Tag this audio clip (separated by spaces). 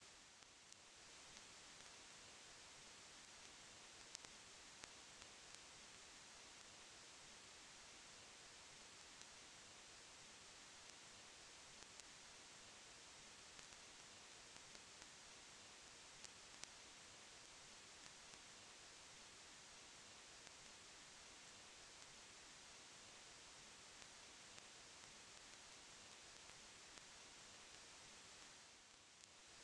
lofi effects recoreds fx vinyl-hiss hissing vinyl tape noise hiss lo-fidelity